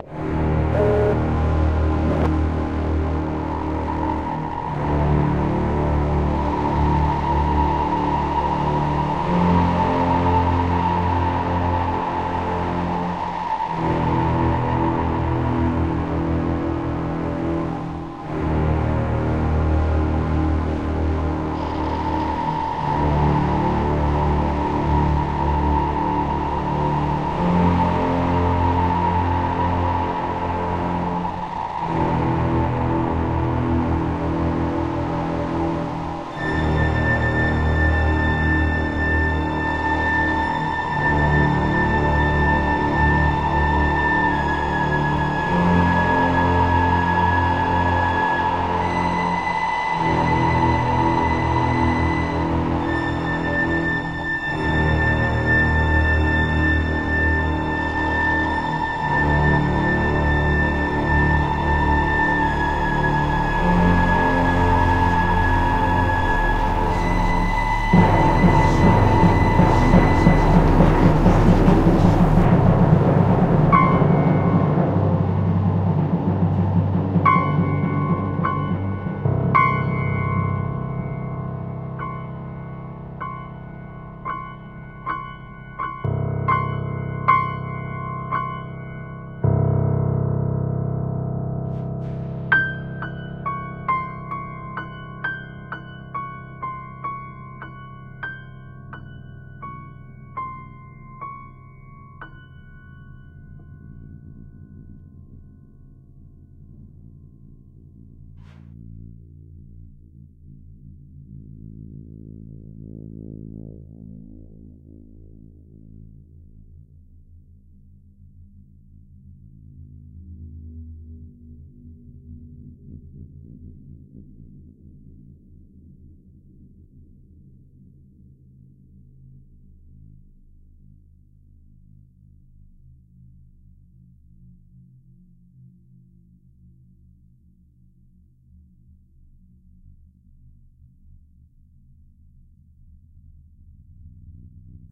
Enas (An aural character sketch)
This is a sound design and ambient piece that makes me think of an auditory sketch of a person realizing that they are insane.
ambient, distant, dreamlike, eerie, Halloween, haunted, horror, spooky, strange, uneasy